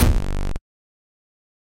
Viral Abstracted BD 05
Abstract, Noise, Industrial
drum, bass